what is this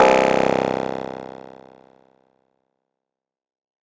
Blips Trails: C2 note, random short blip sounds with short tails from Massive. Sampled into Ableton as instant attacks and then decay immediately with a bit of reverb to smooth out the sound, compression using PSP Compressor2 and PSP Warmer. Random parameters, and very little other effects used. Crazy sounds is what I do.
house, electronic, synth, bpm, glitch-hop, rave, effect, porn-core, acid, synthesizer, electro, dark, 110, glitch, dub-step, bounce, dance, trance, techno, blip, sci-fi, lead, random, processed, club, noise, sound, resonance, hardcore